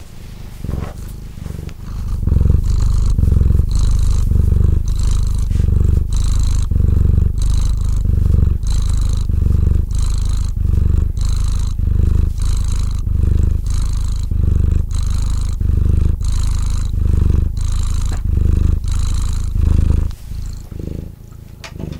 Chub Chub Purrs - Jaba Kitty - Kitty Purrs
My Chubby Cat Purring